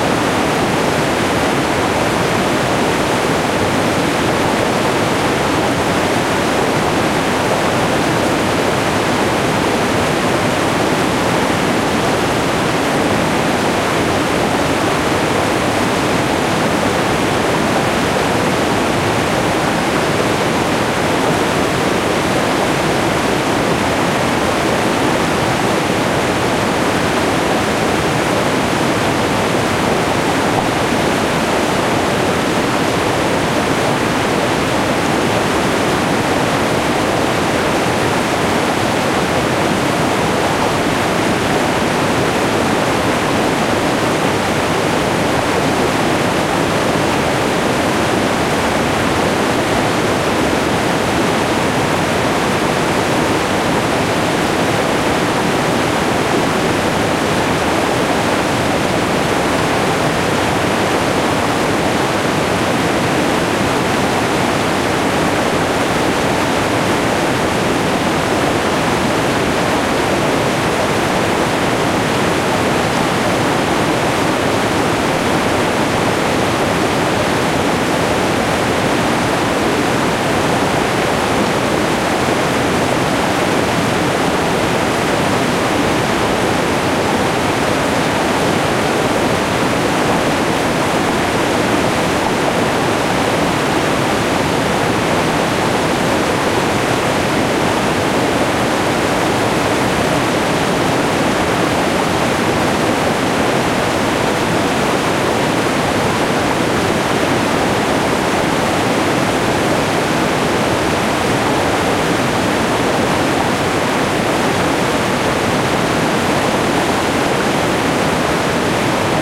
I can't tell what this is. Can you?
A mid-sized waterfall in Western Sweden in summer with a lot of water.
Recorded with a Sony DV-camera and a Sony stereo microphone ECM-MS907
Waterfall, Field-recording, Nature, Water